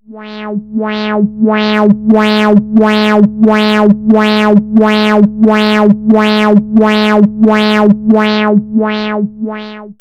Alescouezec - Son01
Here below the various effects applied to my sound :
For start, I have create a Sawtooth with a menu generate sound :
Frequency : 925Hz
Amplitude : 0.9
and the time was adjust to 10 seconds.
After, i have create a halftone at -25,44 and the height at 77%.
For a beautiful opening, i have adjust of 0 to 2 seconds a opening effect.
For close, i have adjust of 8 to 10 seconds a closure effect.
For finally, a good effect the "wah wah"
Frequency LFO : 1,5
Starting Phase : 180 deg
Depht : 70%
Resonance : 3.8
Fréquency Wah : 10%
And for conclude i have standardized.